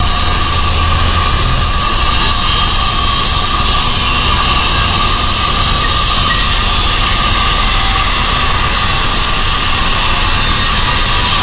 diamond saw / radial
streetnoise, machine